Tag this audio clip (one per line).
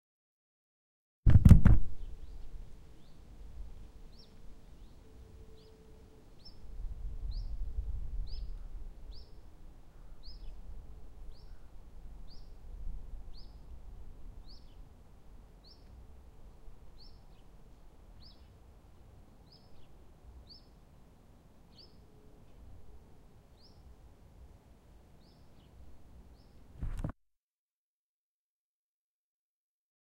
distant
suburb